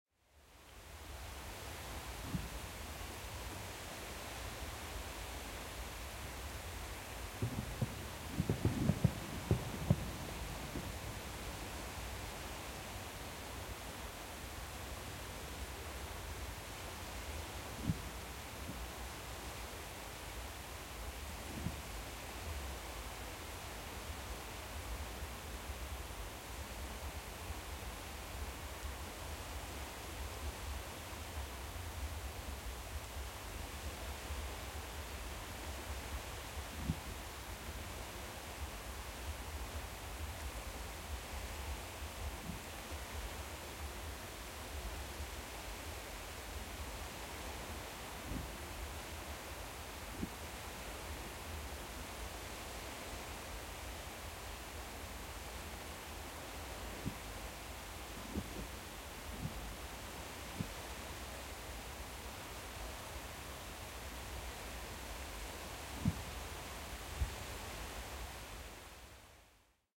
20170101 Pattaya Beach at New Year Celebration 06
Pattaya Beach at New Year Celebration, recorded with Rode iXY.
newyear, beach, fireworks, sea, celebration